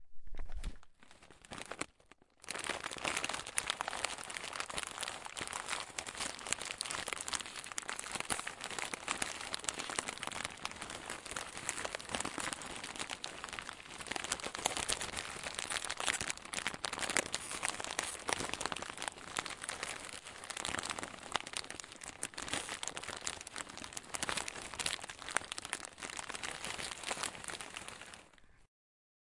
Thunder (Chips bag) 2
The friction of a chips bag evoking a thunder sound (2).
Strike, Thunder